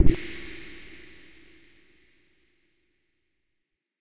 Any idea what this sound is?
batterie 1 - hard weirdness 4
BATTERIE 01 PACK is a series of mainly soft drum sounds distilled from a home recording with my zoom H4 recorder. The description of the sounds is in the name. Created with Native Instruments Battery 3 within Cubase 5.
drum-hit, mellow, percussion, short, soft